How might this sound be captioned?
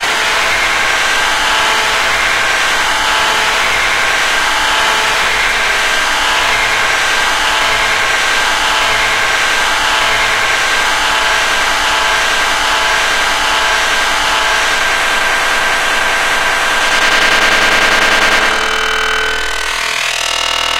Grinding Machine that increases speed.
industrial, machine, robotic, noise, robot, mechanical, factory, machinery